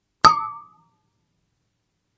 samples in this pack are "percussion"-hits i recorded in a free session, recorded with the built-in mic of the powerbook
ping, metal, bottle, pong, water, boing, noise